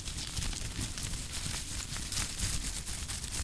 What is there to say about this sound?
paper rustle 1
Paper rustled in front of mic